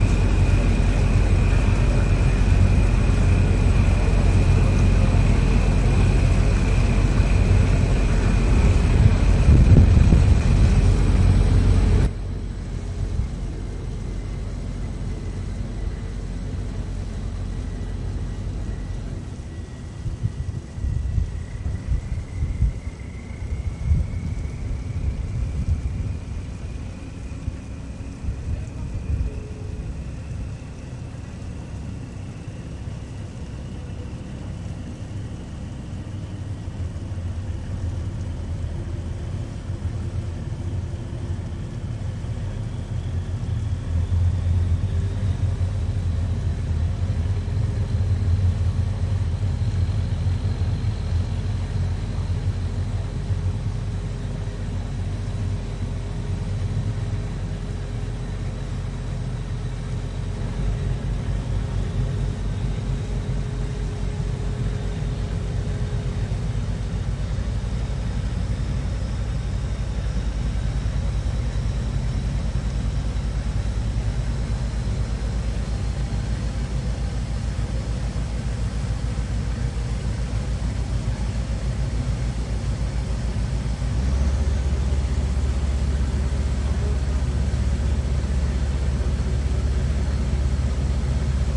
Ferry between Lumut and Pangkor Island (both Perak - Malaysia)